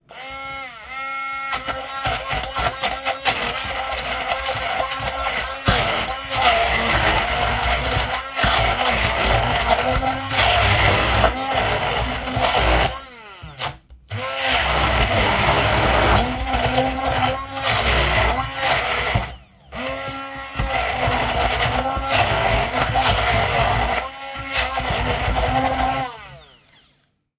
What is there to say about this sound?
Recorded during blending chickpeas.
blending, kitchen, mix, food-machine, blender